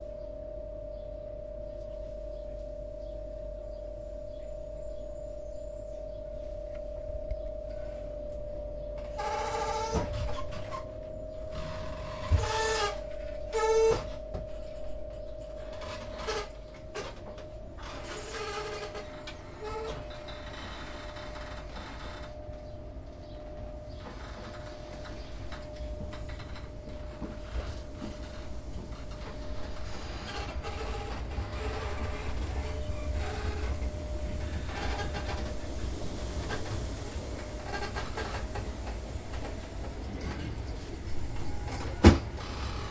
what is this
TRAIN INTERIOR CORNWALL
Recording from inside the doorway of a carriage arriving into Saltash, Cornwall, recorder on a Tascam DR 40